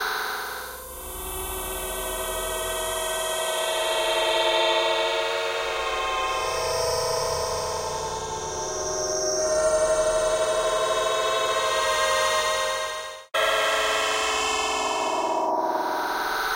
A shuffled and heavily processed sample from the spectrum synth room in Metasynth. There is a large amount of resonance and it is slowed down, but you can still tell it is made from a female voice. The original input was myself singing part of the song Hello by Dragonette.
resonance, creepy, female-voice, metasynth, shimmer, voice-sample, processed-voice, slow, voice, dragonette